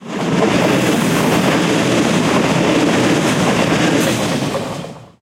very short smaple of train passing. Audiotechnica BP4025 stereo mic, Shure FP24 preamp, Olympus LS10 recorder. Recorded near Osuna, S Spain
train, field-recording